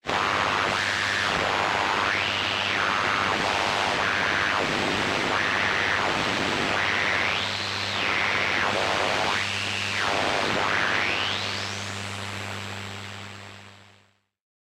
some "natural" and due to hardware used radio interferences